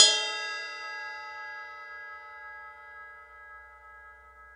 DW - Ride - 003 (Edit)
SM57 microphone
Set of A Customs
17" Fast Crash
18" Fast Crash
21" Projection Ride
90's punk drummers rejoice !
crash, cymbals, drums, percussion, percussive, ride, zildian